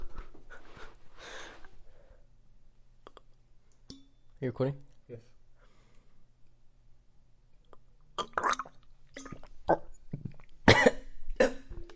A man chokes on some water before coughing.